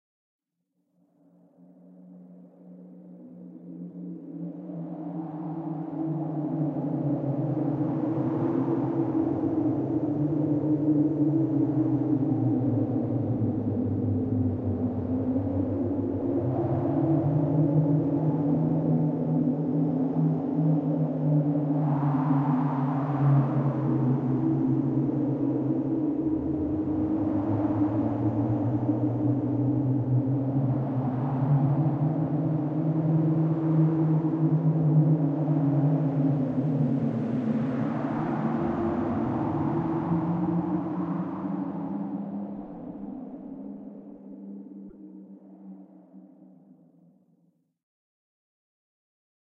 Halloween - Graveyard At Night Howling Wind
wind howling through graveyard at night
Halloween, eerie, scary, night, graveyard, howling, wind